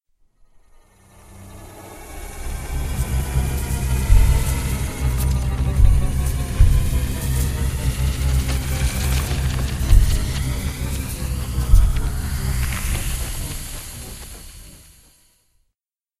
Have You Ever Teleported?
The meat of this sound is actually my truck horn, believe it or not. I Paulstretched (an effect in Audacity) the sound of my truck horn, lowered the noise, and added some reversed coins bouncing around in each stereo ear to make this sound.
space, portal, science, sound, teleport